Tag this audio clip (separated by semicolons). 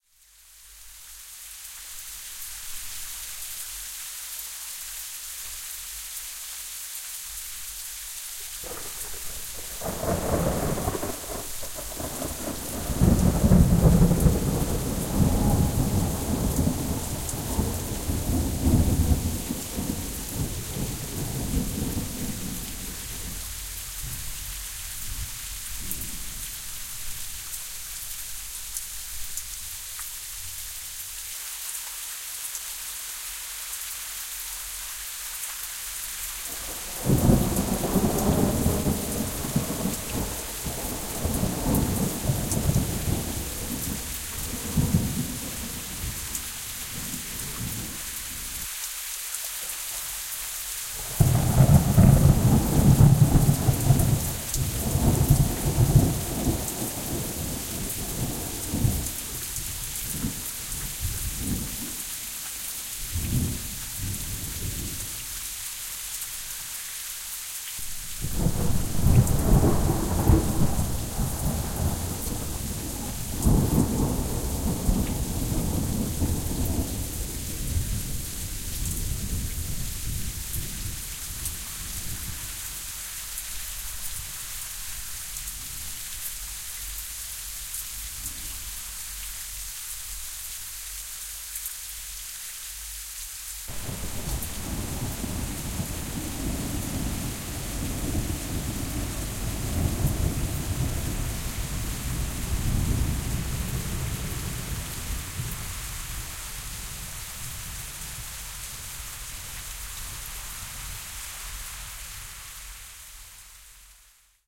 Lightning; Rain; Storm; Thunder; Thunderstorm; Weather